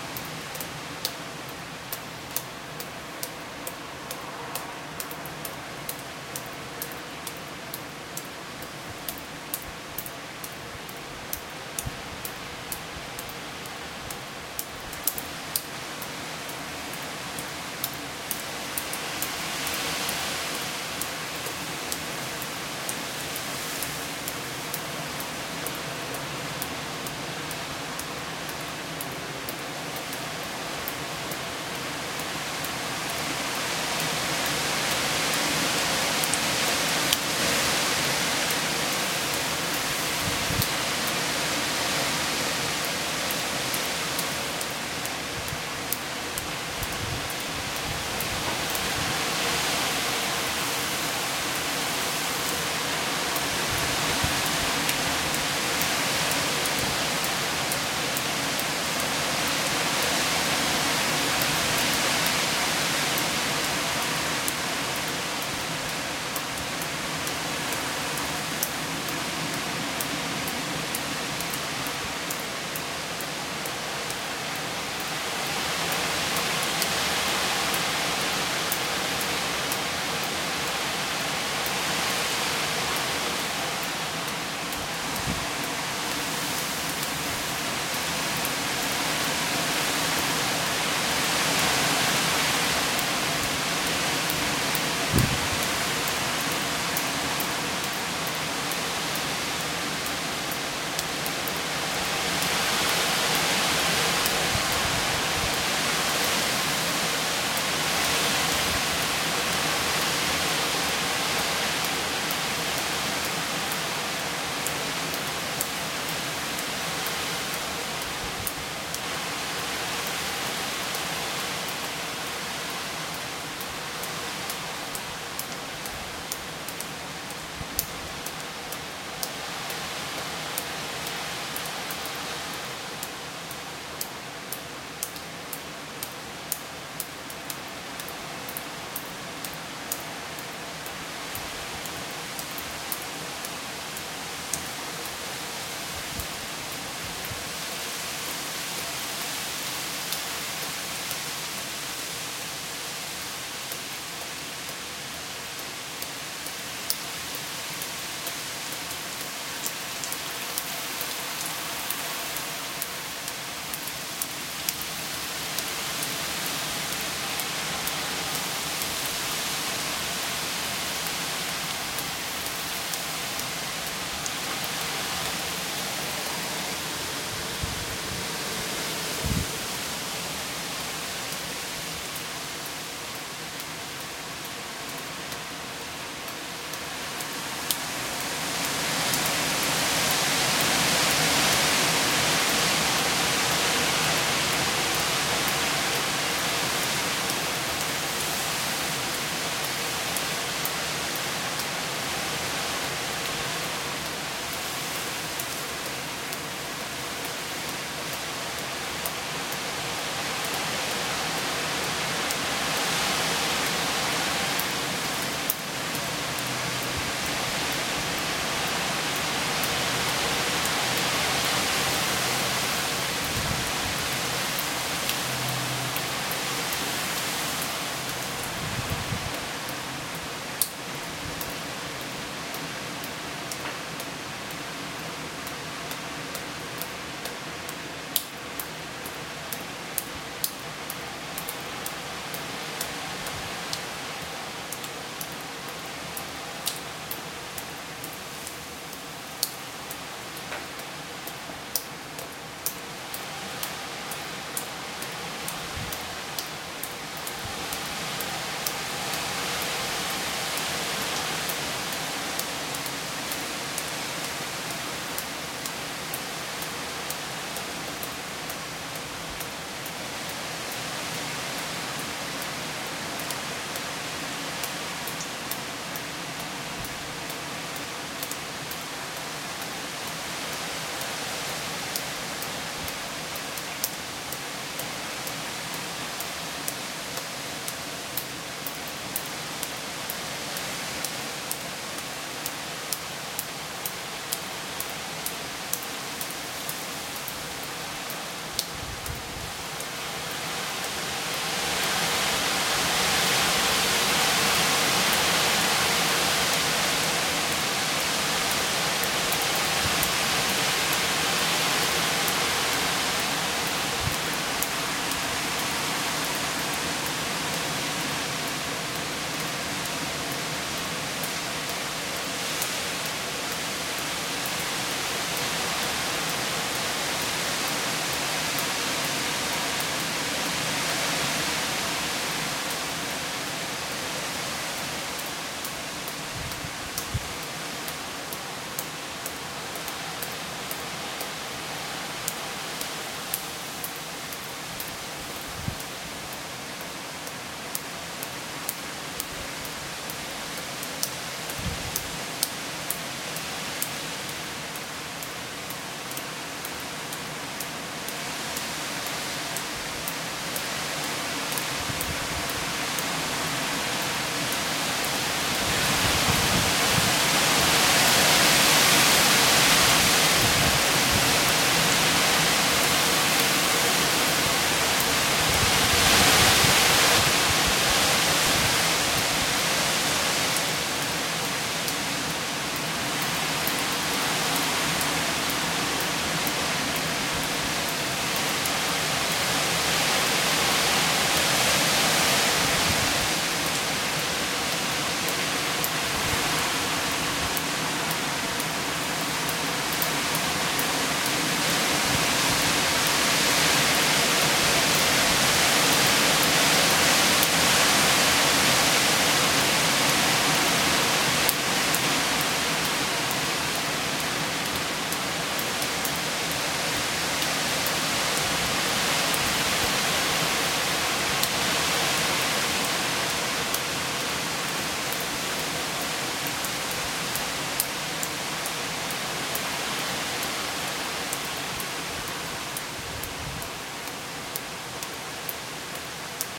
Recording of wind and rain in a storm in south UK, 28th Oct 2013. Wind can be heard blowing through nearby woods and leaves rustling. Dripping of rain from the eves of the house. Mostly white noise hissing with volume rising and falling. Recorded using a Canon D550 out the window/door. The files were edited to remove wind when it directly blows on the microphone.